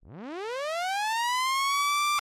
SCIAlrm 8 bit sweep high
8-bit similar sounds generated on Pro Tools from a sawtooth wave signal modulated with some plug-ins
8bit, alarm, alert, beep, computer, robot, scifi, spaceship, synth